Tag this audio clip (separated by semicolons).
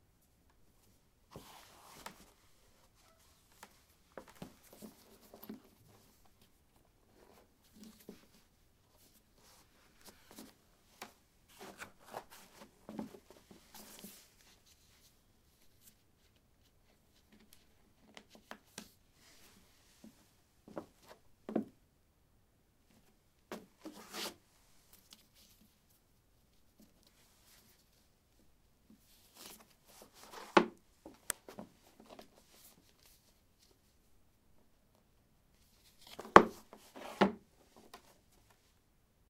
footstep; step; footsteps; steps